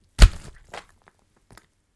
Impact with gore 4
Some gruesome squelches, heavy impacts and random bits of foley that have been lying around.
mayhem, gore, blood, squelch, splat, death